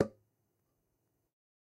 Metal Timbale closed 011
real, record, conga, trash, home, god, closed